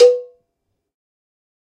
MEDIUM COWBELL OF GOD 044
drum, god, kit, more, pack